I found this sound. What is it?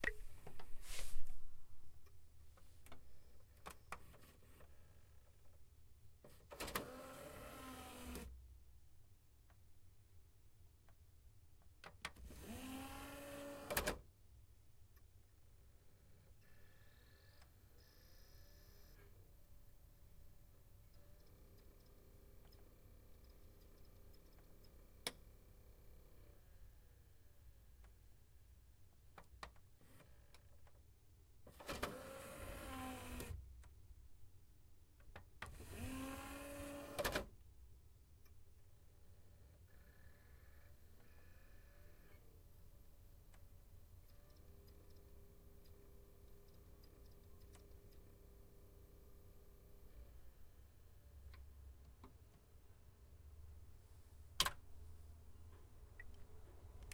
DVD AbreCierra
Opening a dvd reproductor and closing it.
cd, dvd, lectora, open